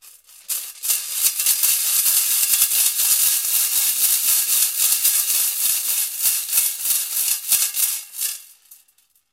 Rotating bolts in a tube 01
A bunch of tiny bolts rotating inside of a metal tube. Recorded with Tascam DR 22WL and tripod.
clinking, hardware, metallic, industrial, iron, bolts, percussion, work, metal, tube, tool, percussive, hit